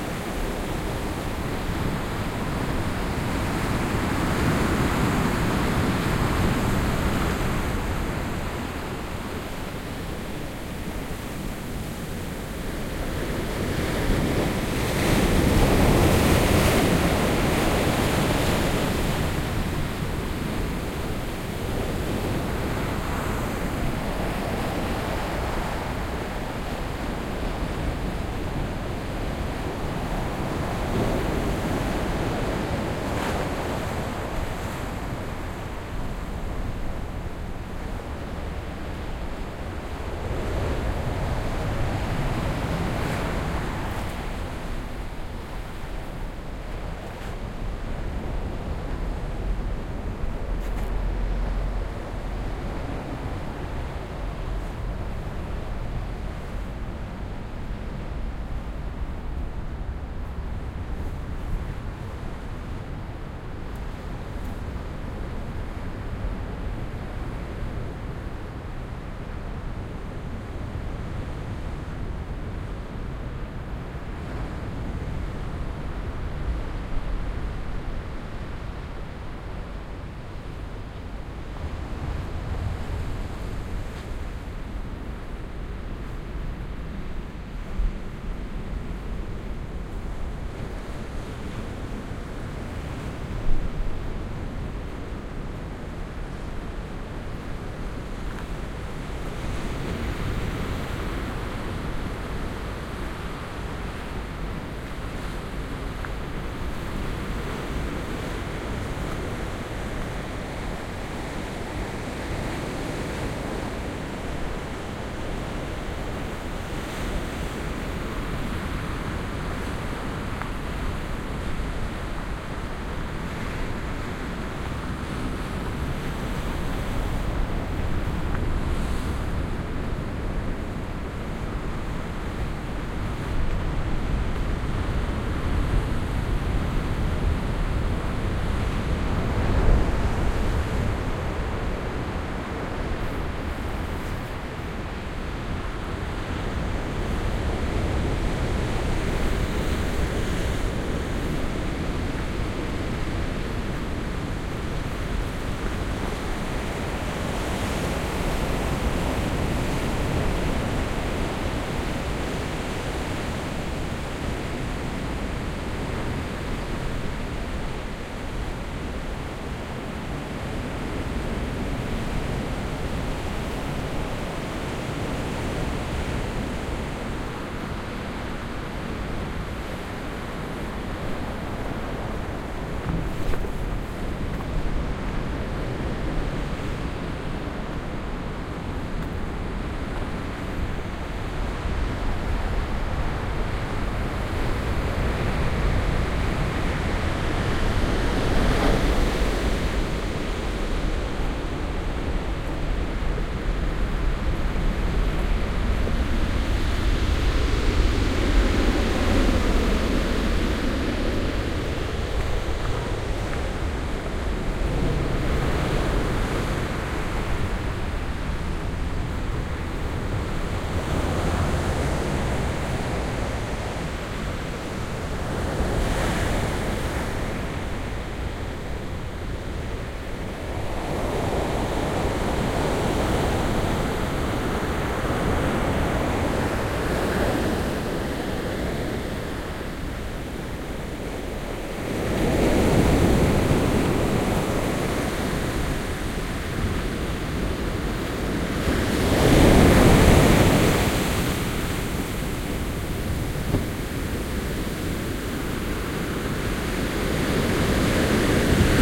porto 19-05-14 playa arena tranquilo entre rocas alejando
Quiet day, close recording of the breaking waves. Walking away and the soundscape varies.